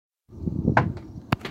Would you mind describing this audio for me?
sound effect 1
knocking, low, sound-effect